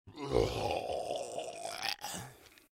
Burp Monster Zombie groan moan
Okay to be fair, it is a burp, but when you cut off the ending when i take a breath it will be easily usable for a zombie sfx.
I had a lot of fun while recording it. Haha~
moaning, groan, Burp, monster, moan, undead